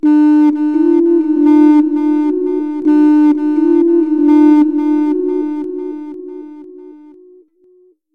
Freed-back - 8
Various flute-like sounds made by putting a mic into a tin can, and moving the speakers around it to get different notes. Ambient, good for meditation music and chill.
feedback, flutes